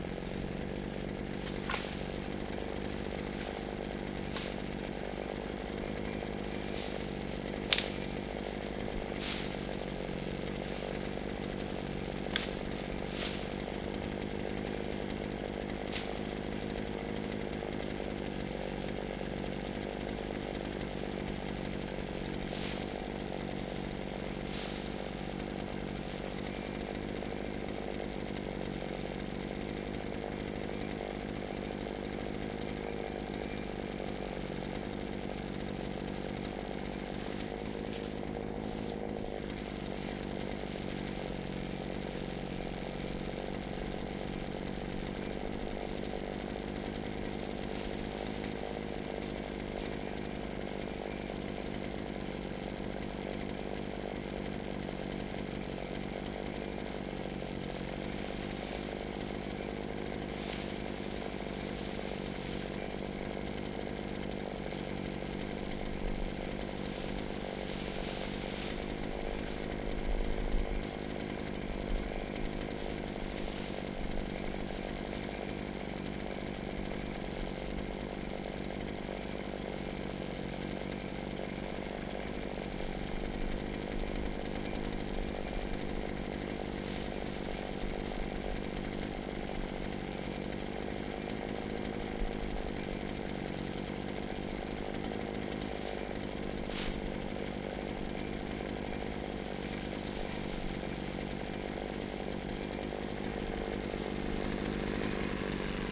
chainsaw idle 20ft +brush2
idle, chainsaw, brush2, 20ft